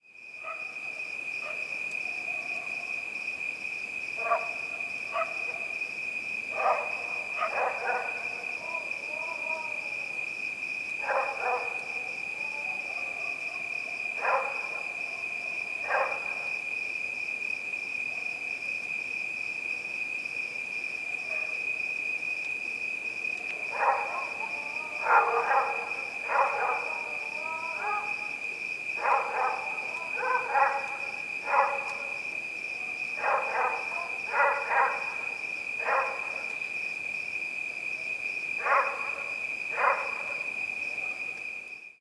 night dogs medina marrakesh
This recording was made in Medina, Marrakesh in February 2014.